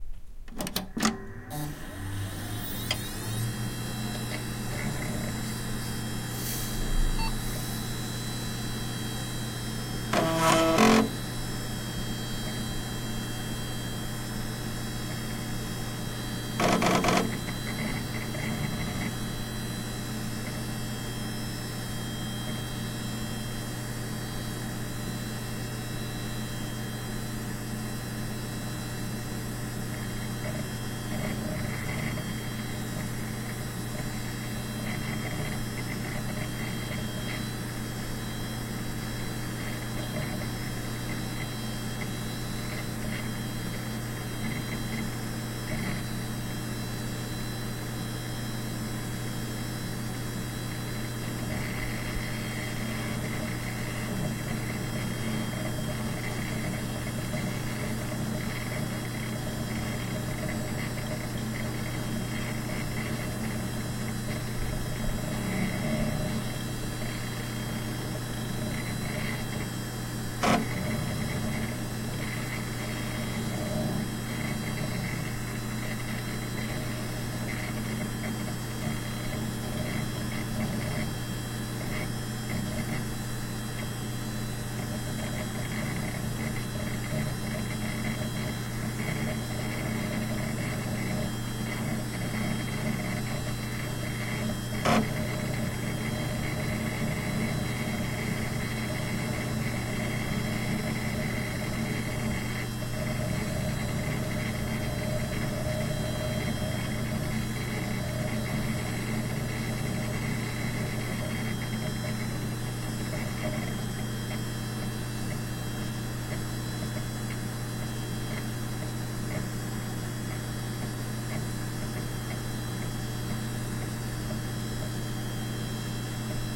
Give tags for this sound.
90s; data; loading; power-on

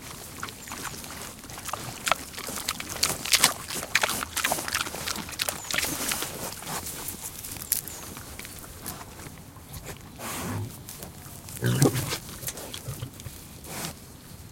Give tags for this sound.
eating; pig; farm; squeak; pigs; squeal; grumble; grunt; grunting

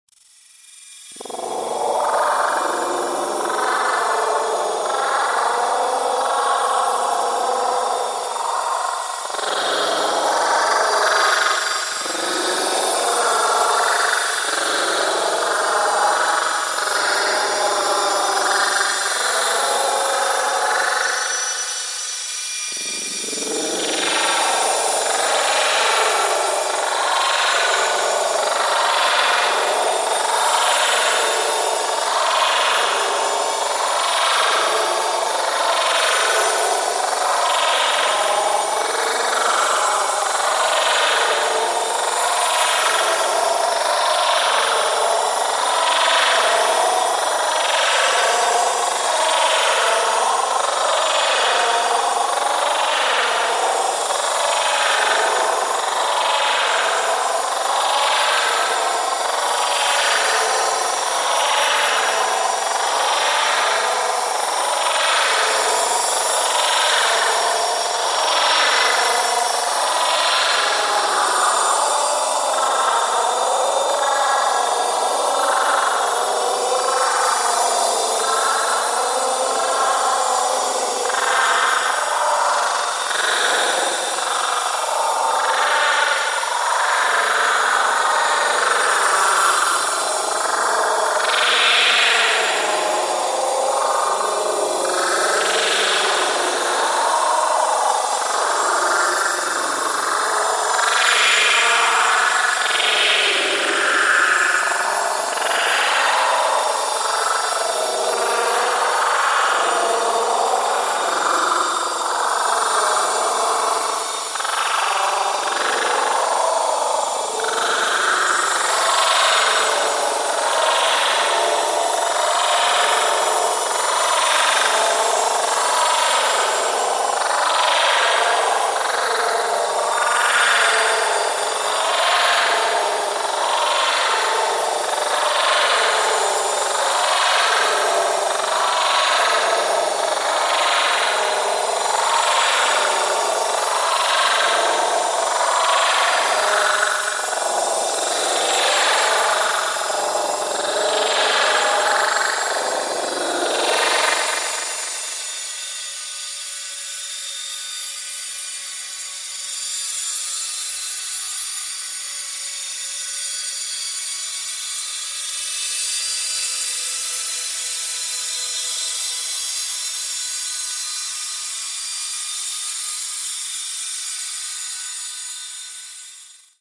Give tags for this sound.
Alien; Alien-sound; Alien-voice; Aliens-pissposs; Sounds; assleys-pissposs; creepy; dick-assley; horror; noise

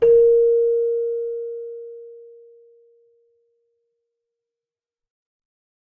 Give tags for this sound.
celesta; chimes; keyboard; bell

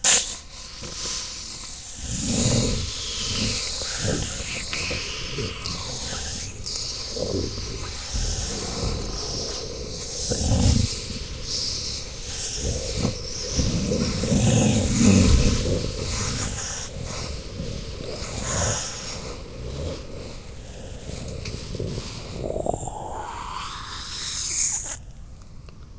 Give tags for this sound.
competition; element; fire; human-sample; matches

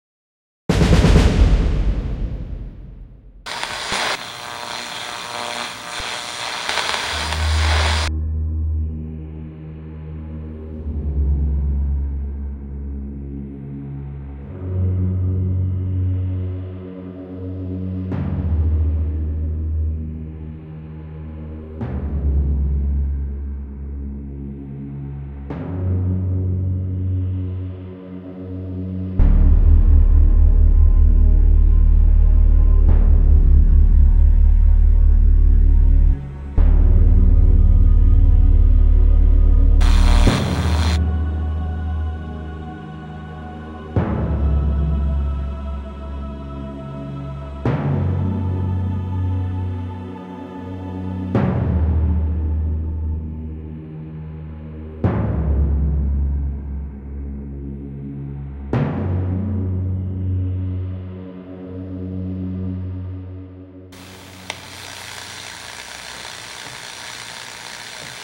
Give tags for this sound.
future; radio; space; star; SUN; wave

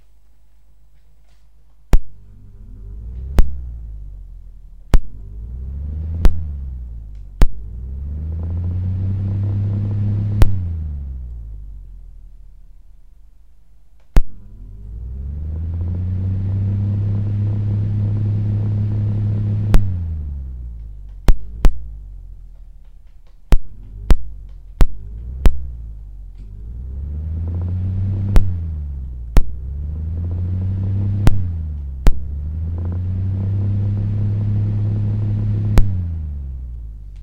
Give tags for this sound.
compressor; down; engine; fan; generator; Hum; Ignition; machine; machinery; mechanical; motor; office; Operation; Power; shutting; Sounds; start; starting; up

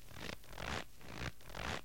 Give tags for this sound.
snow; step; loop

